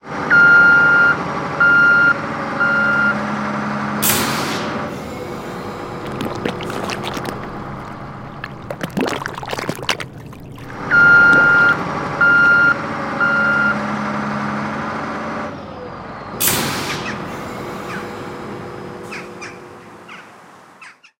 An alarming sound from a truck driving backwards, Splashing water and jackdaws.
signal, alarm, Ghent, engine, harbor, water, beep, kanaalzone, jackdaw